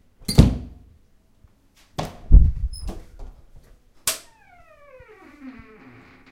open door 2
This sound is part of the sound creation that has to be done in the subject Sound Creation Lab in Pompeu Fabra university. It consists on a person opening a door.
close, door, open, opening, squeak, squeaky, UPF-CS14, wood, wooden